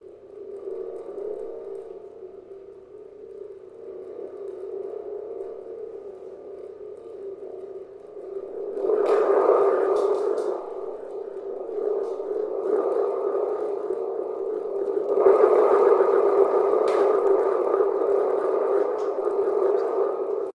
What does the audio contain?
Strange Space Sound
A long metal spring was glued onto a cardboard tube, and when whirled, it makes a very strange but interesting sound. A Zoom H6 recorder was used with the shotgun/pistol mic.